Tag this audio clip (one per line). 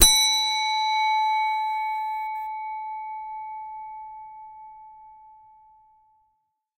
bell
metallic